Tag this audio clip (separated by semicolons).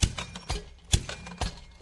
factory; industrial; loop; machine; machinery; office; plant; print; sfx